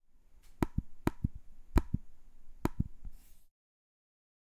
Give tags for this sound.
computer clicking macbook trackpad tapping

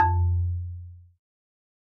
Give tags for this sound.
percussion instrument wood marimba

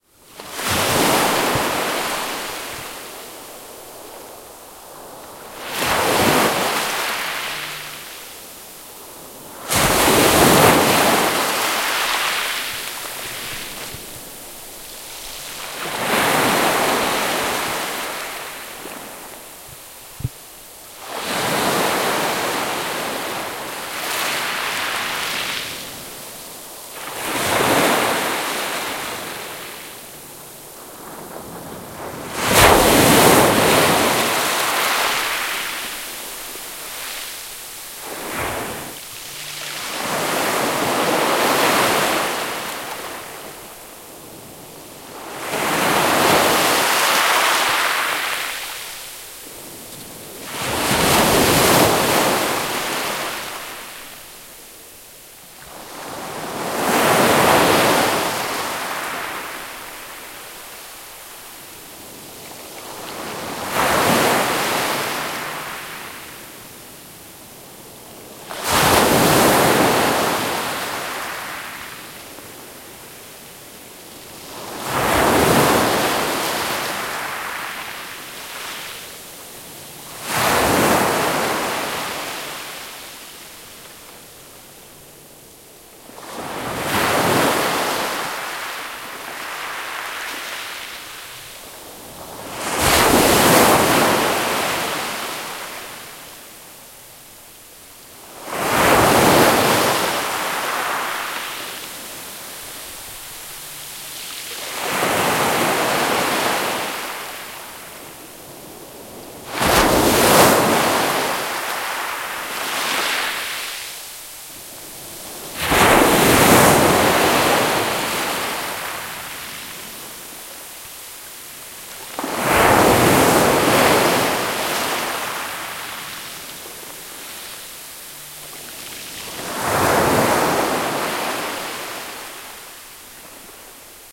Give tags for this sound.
shore surf crashing waves bay beach ocean rocky foam

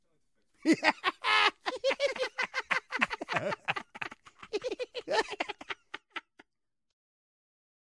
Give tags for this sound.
fantasy annoying laughing

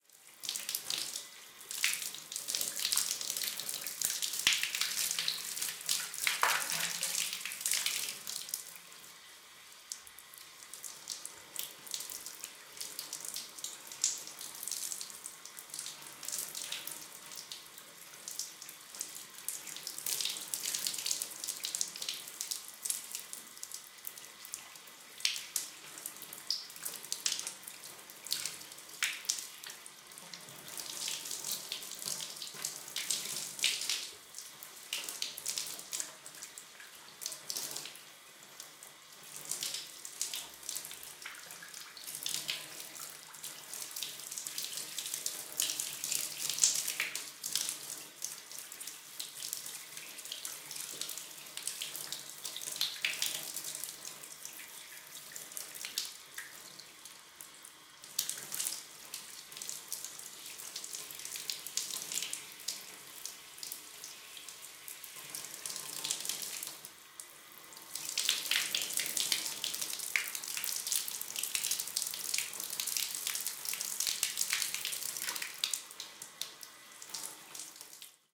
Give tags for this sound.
recording shower field bathroom

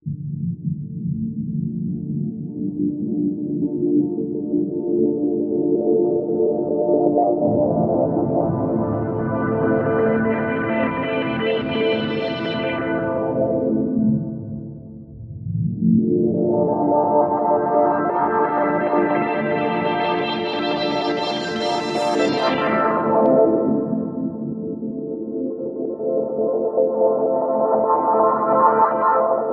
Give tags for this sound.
130 pad morphing expansive dreamy melodic bpm long ambience house effects progressive wide lushes atmosphere evolving liquid soundscape reverb

